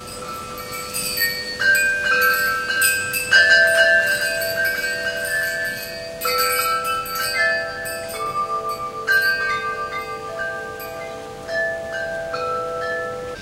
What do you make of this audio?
chimes part 4
These short files are random selections from a 9min composite audio file I put together from an accidental recording made when I left my Sony Camcorder on in my studio.
They are part of the same series posted elsewhere on thefreesoundproject site titled "accidental recordist".
There is some hiss/background noise which is part of the street front urban scene of my studio.
bells, chimes, instrumental, music, tinkle, wind